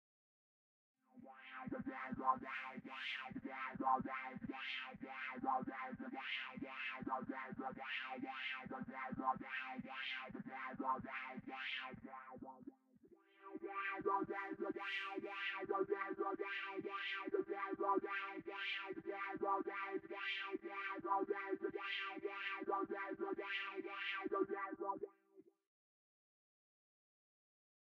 A synthesizer with a talkbox effect applied.